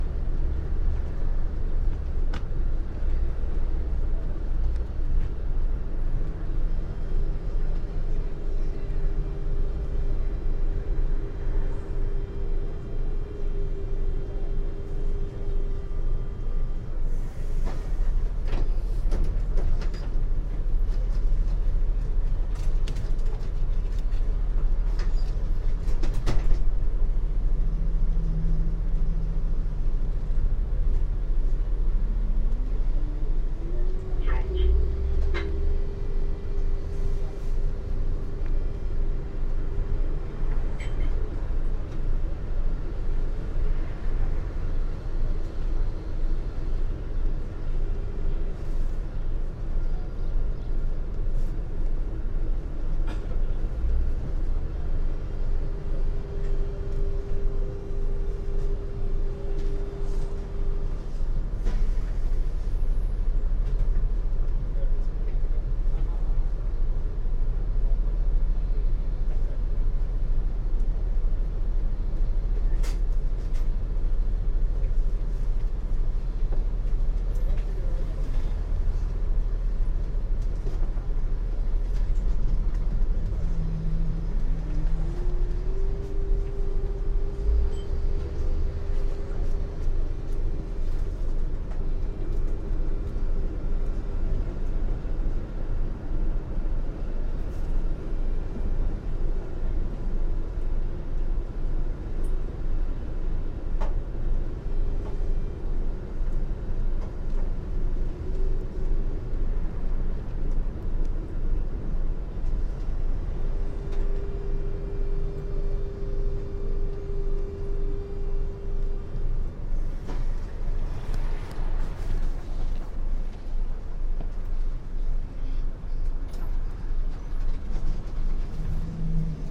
Mono recording of a streetcar ride. A little conversation in the background.